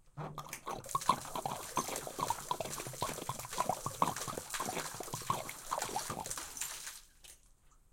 Big Liquid Gurgle Pour Splash FF201
Water, gurgle, pouring, water jug, splash, slow pour, loud, glug
Liquid, Slow-pour, Water, Water-jug, Water-pouring